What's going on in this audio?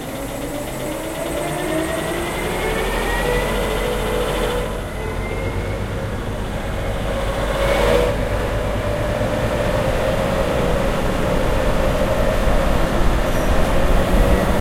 engine3 up
A machine accelerating.
speeding, speeding-up